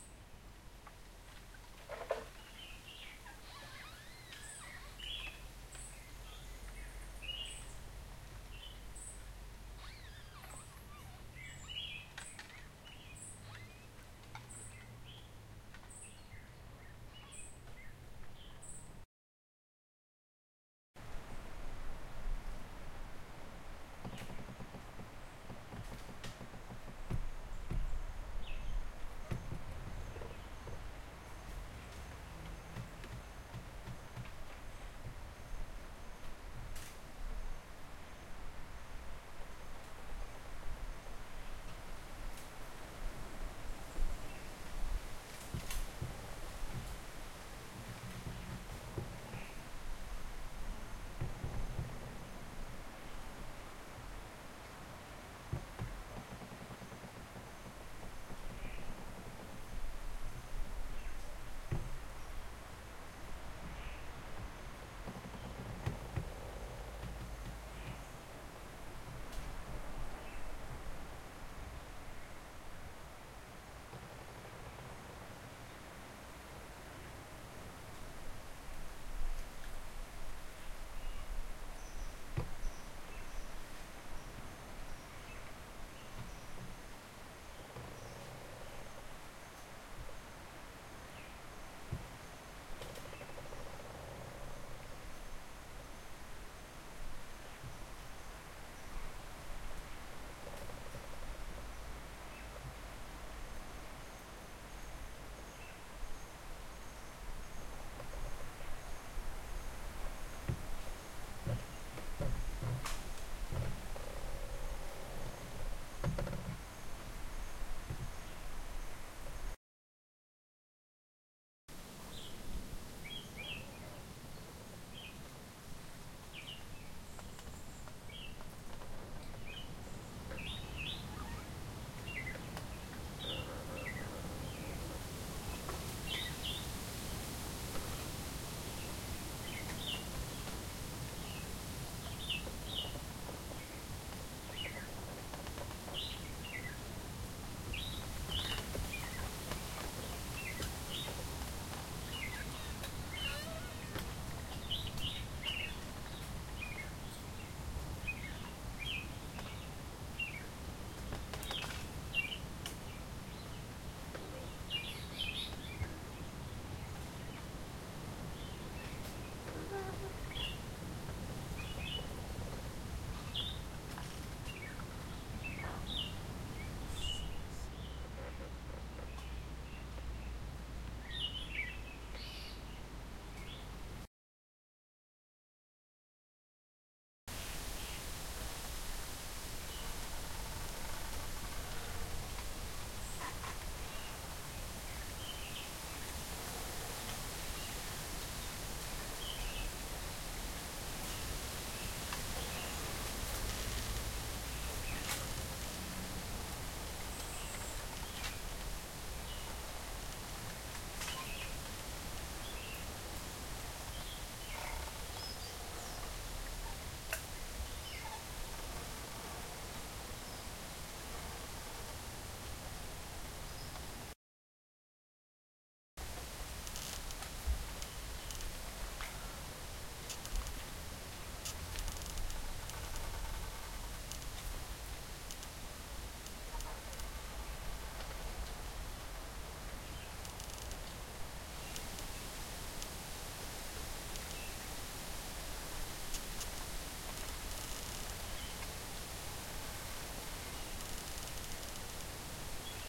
Amb - Bamboos creaking and rustling with the wind
A bamboo grove near the Anse Des Cascades (Reunion Island, St Rose). Bamboos creaks as they hit each others with the wind, their leaves rustle in the wind. The waves from the nearby Indian Ocean can be heard.
Multiple takes separated by silences.
Recorded with : Zoom H1 stereo mic (1st gen)